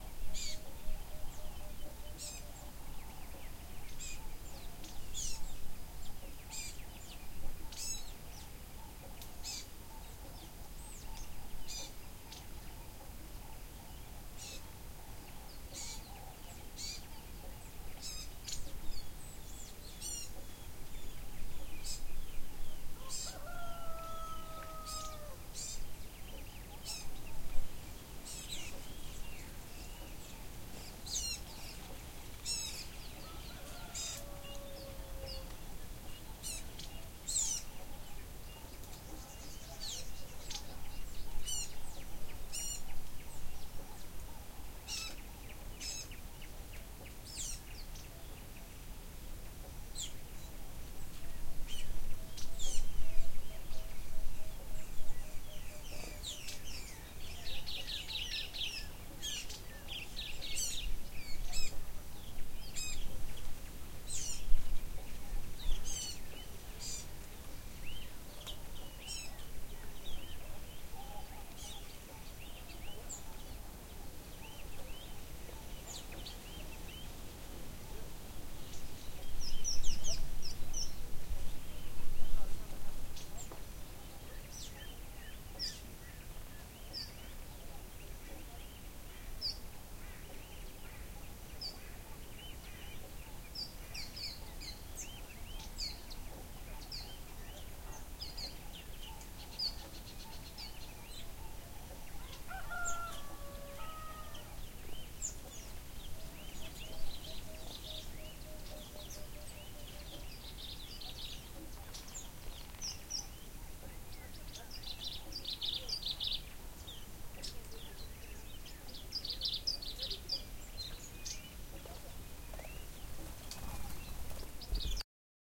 Nature Sounds Quiet Environment
A quiet environment with birds singing at a distance